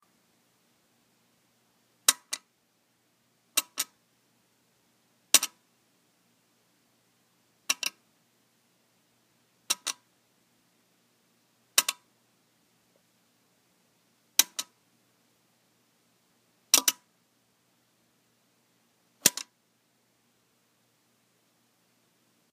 Sound of metal drawer handle being jostled, recorded with internal mic of iPhone 4S (sorry to all audio pros 😢). Poor man's imitation of unknown mechanical sound inside grandfather clock.